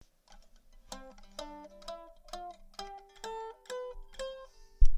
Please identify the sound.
mandolin-CDEFGABC
This sound is consist of mandolin.
It's included only CDEFGABC.
mandolin, scale, strings